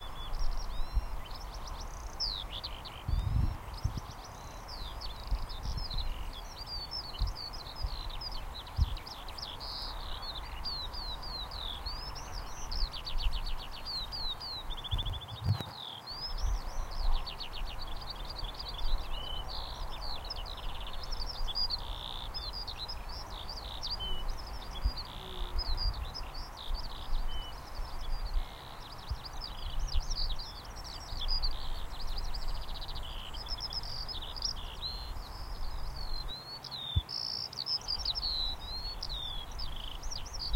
lark-eq
This was recorded at the edge of a field, so it's literally a field recording. It's summer, there has been some rain but now it has stopped and the sun is out. A lark is singing its heart out. There is some wind noise which I've reduced with EQ and noise removal using Audacity, also some traffic noise from the road some way to my right (hard to get away from traffic noise). Recorded with a Zoom H1 using the in-built microphones.